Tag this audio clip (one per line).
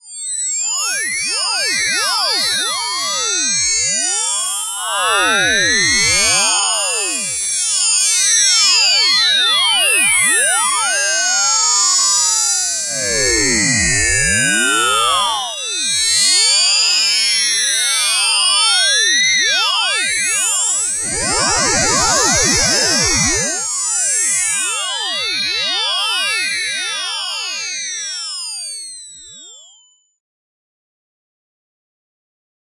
fm
soundeffect
synth